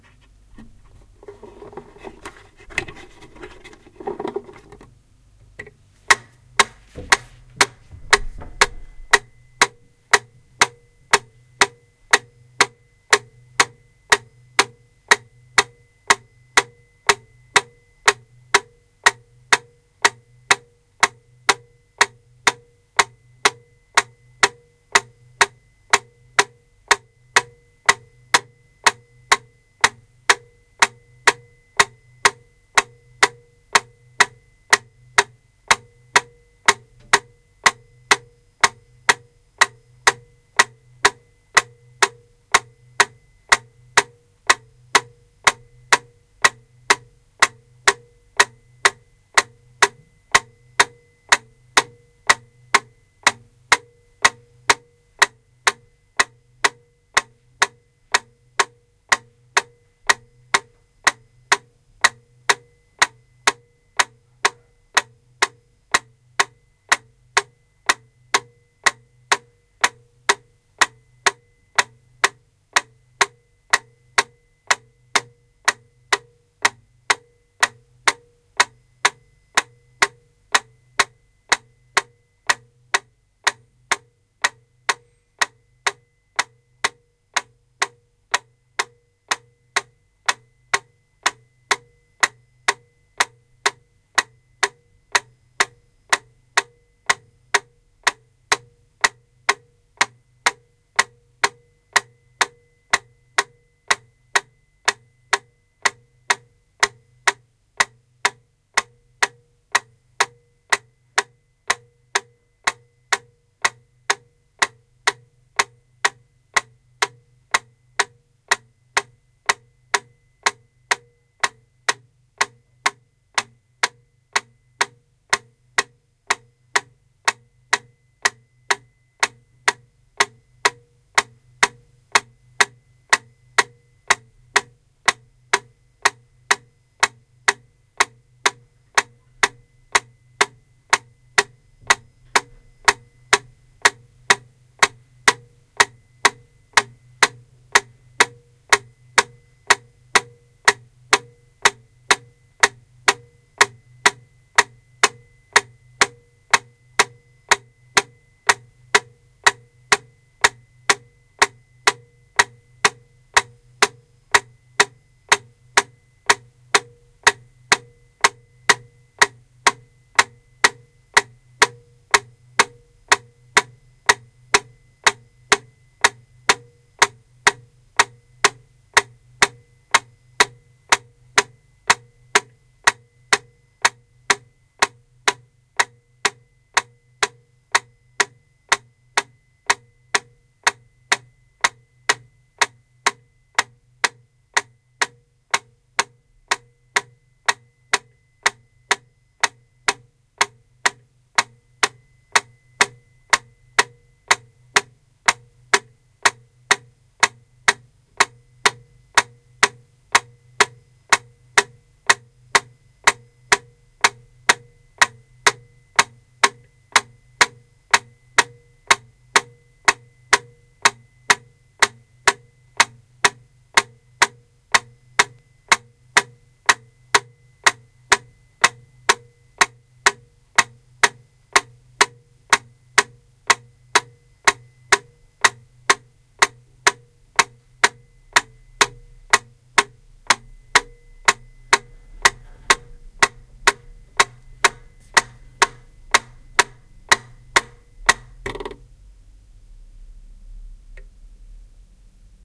20060802.120.BPM.closet
sound of my metronome, at 120 BPM inside a closet full of clothing. This is a common metronome with plastic case, around 25 yrs old. For some reason it makes no noise at at all when you wind it up. Rode NT4 > MZ-N10 MD